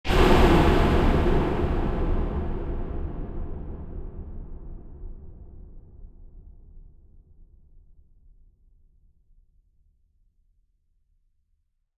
Horror Cinema 5 2014
A simple impact sound created by putting my hand held recorder into an empty drying machine and slamming the dryer door. It was then given some basic audio effects mainly consisting of EQ and reverb.
Cinematic, Impact, Percussion, Ambient, Hit, Horror, Atmosphere, Film